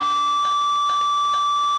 Scream Guitar Feedback
Short and high pitched guitar screech.
feedback, distortion, guitar, scream, guitar-feedback, noise, lofi